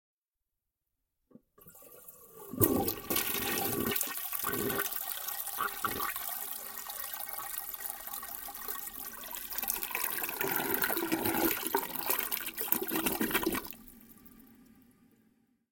flushed it
Flushing an old toilet